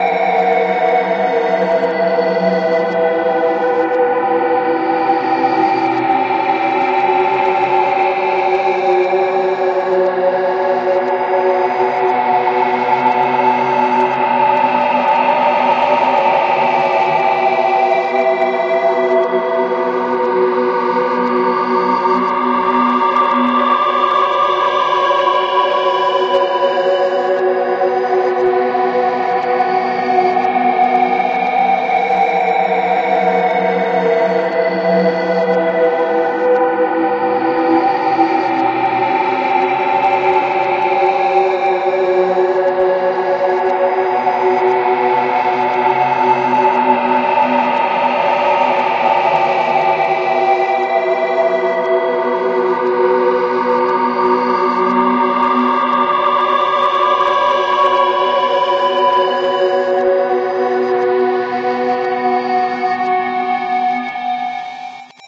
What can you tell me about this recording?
Light terrors
Even cracks of light sound terrible in hell
feedback, spooky, dark, terror, beam